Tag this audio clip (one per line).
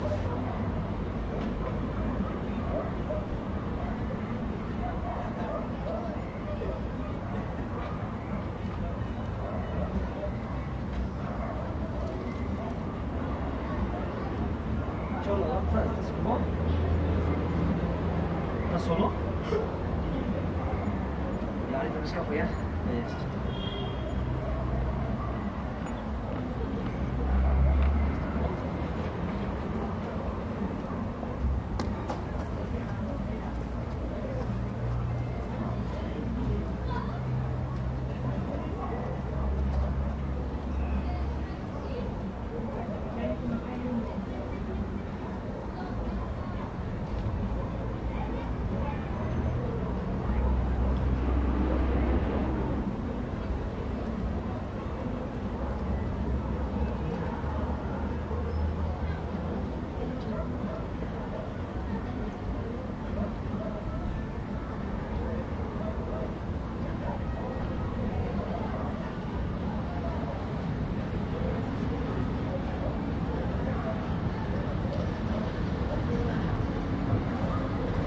ambience
ambient
America
corner
distant
dog
night
people
Peru
quiet
South
street
traffic